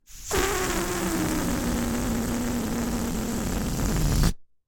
Balloon Deflate Short 6
Recorded as part of a collection of sounds created by manipulating a balloon.